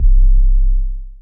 ms-kick 6
Percussion elements created with the Korg MS-2000 analog modeling synthesizer for the album "Low tech Sky high" by esthing on Friskee Media
analog, kick, modeling, percussion, raw, synthesizer